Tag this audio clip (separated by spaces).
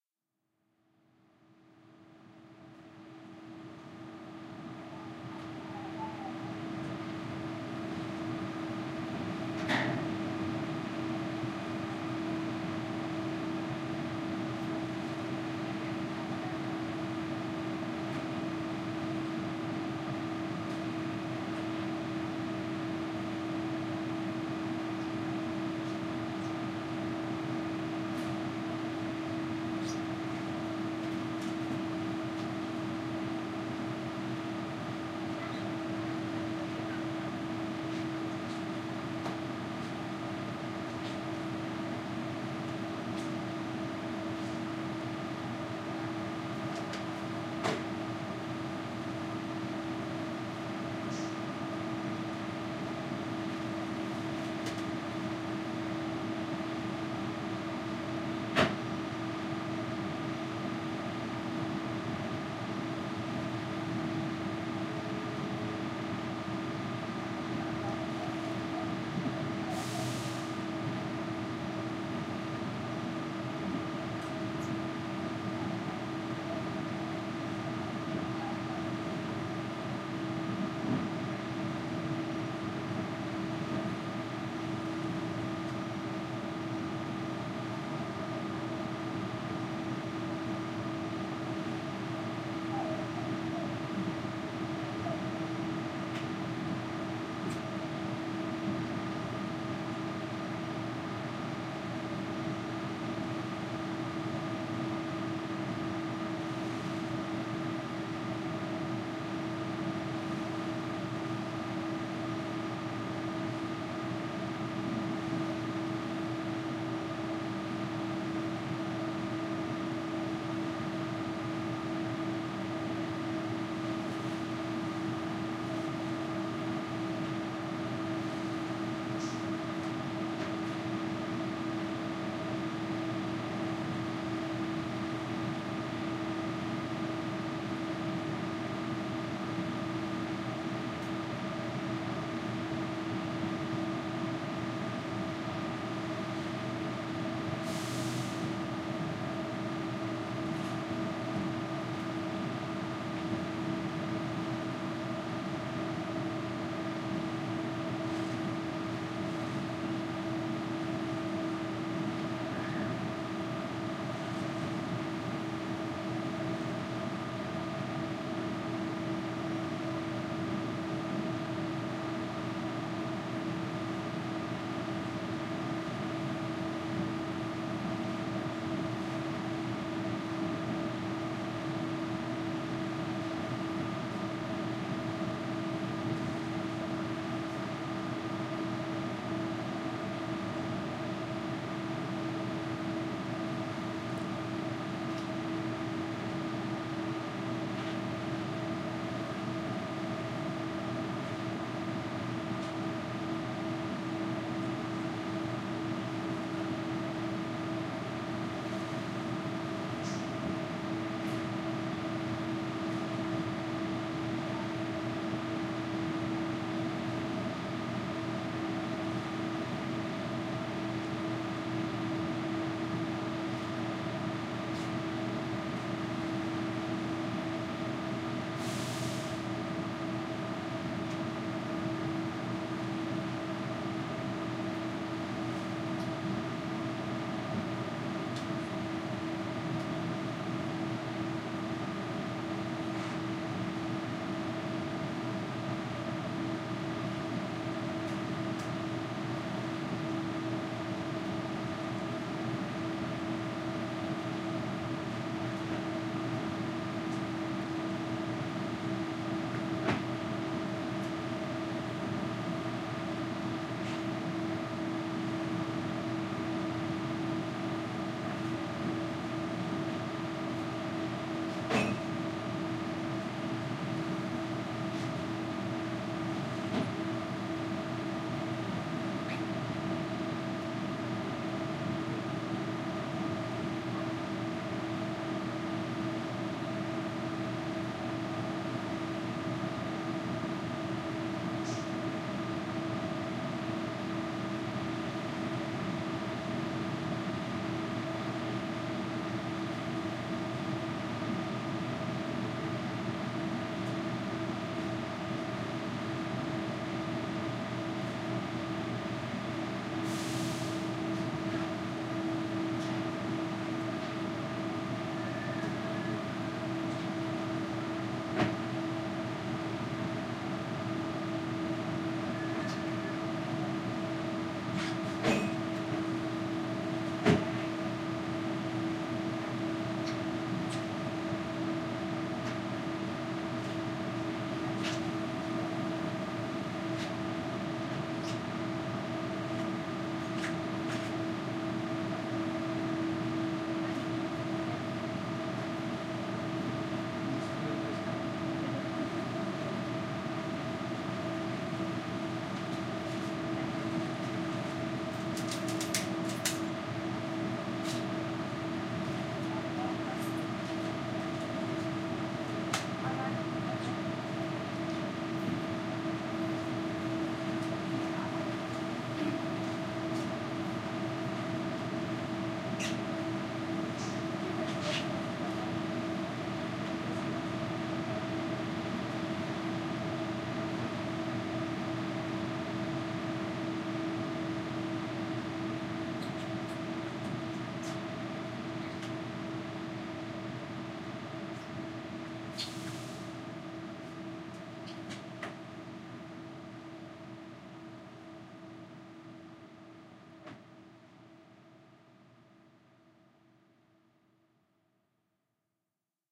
general-noise soundscape atmosphere background-sound field recording ambient